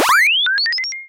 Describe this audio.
Retro video game sfx - Computer on
Sounds like a computer powering on and starting up.
8-bit, arcade, atari, bfxr, chip, chipsound, computer, game, labchirp, lo-fi, retro, robot, video-game